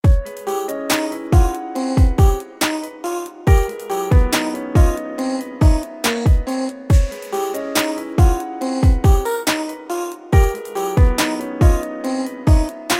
New Composition
a vox and arp sound for some good choruses
Pop, vox